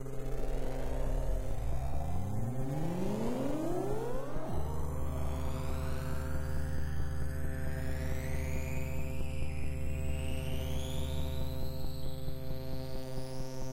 A few high quality ambient/space sounds to start.